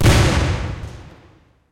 cannon boom6
boom, explosion, big, large, cannon